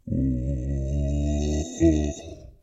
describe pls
Zombie moan 2
horror, ghoul, roar, undead, zombie, snarl, monster, hiss, moan, gargle, creepy, growl, moaning